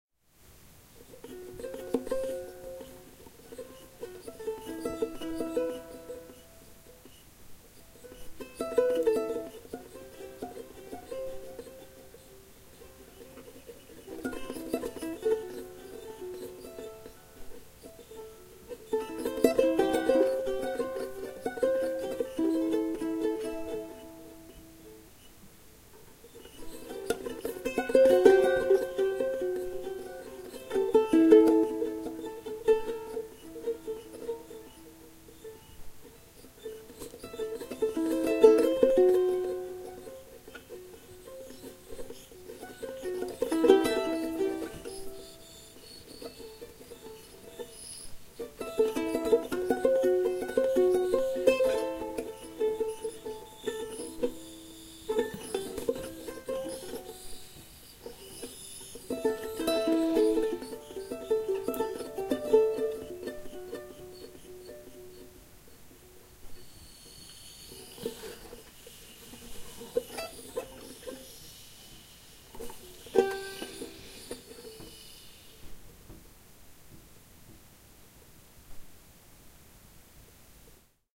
Charango improv
Very quiet Charango (small Andean stringed instrument) improvisation.
charango, improvisation, plucked, string